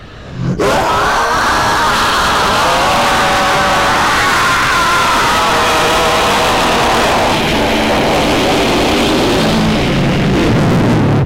Just some layers of me making scary sounds with my voice(heavily distorted). From the creator of "Gears Of Destruction".
creepy, scream, horror, scary